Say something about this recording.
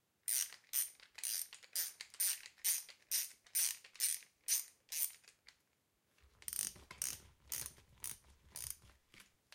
Sound of torque wrench in a garage.
Recored with a Zoom H1.